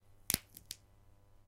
A lot of sound design effect sounds, like for breaking bones and stuff, are made from 'vegetable' recordings. Two Behringer B-1 mics -> 35% panning.
breaking, horror, carrot, pulse